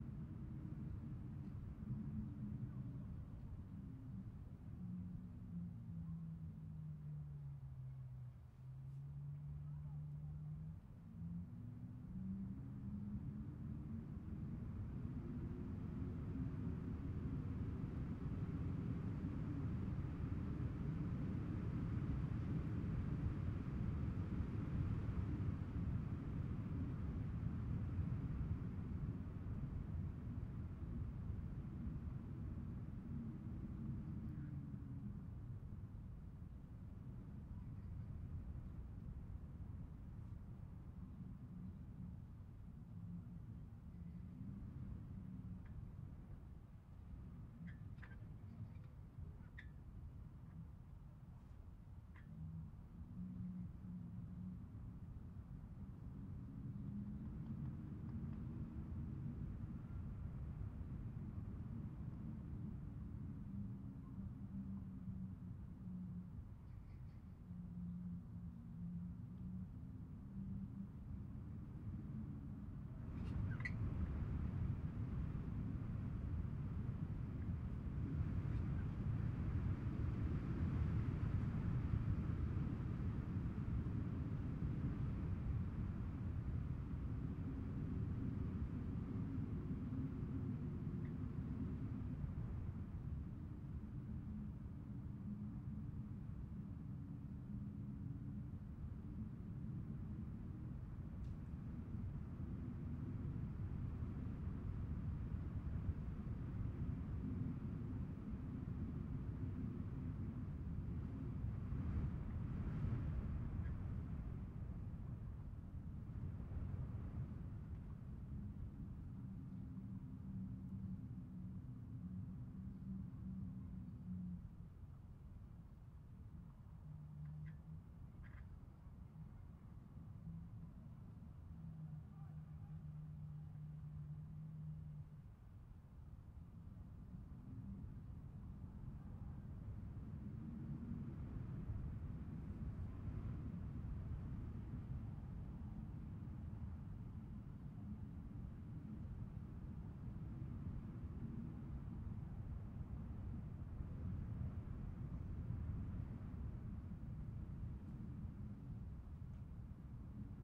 evil wind
Wind sound recorded through a small hole in a window from the eighth floor.
Recorded with a Zoom H6 recorder and a Rode NTG-2 microphone
wind, ambience, evil